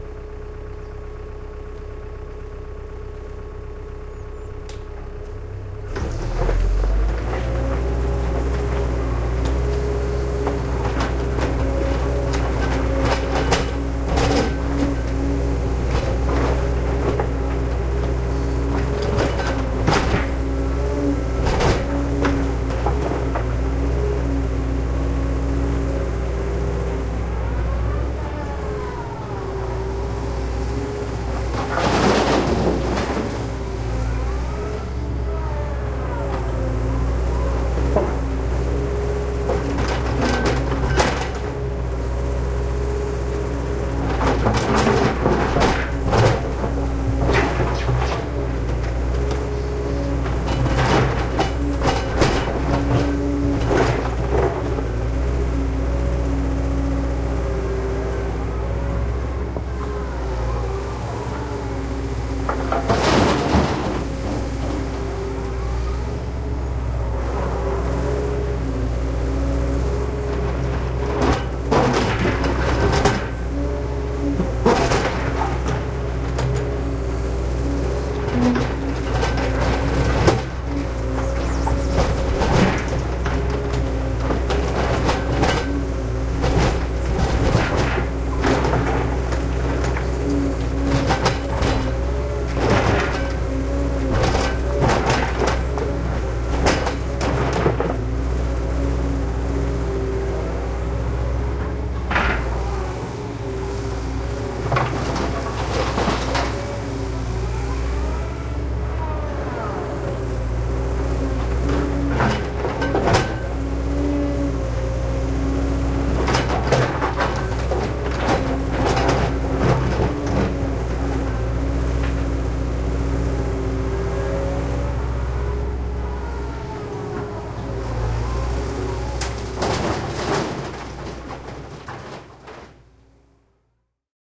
A company is digging up big stones and old movement our street with CAT 312C to add new movement. Has lived with the sounds for about 2 months. Could not resist to record.
With a compressor I keep the peaks down
Recorder F4 Zoom
Microphones 2 CM3 Line Audio
Rycote Stereo Baby Ball´s as windshield
Software Audacity Wavelab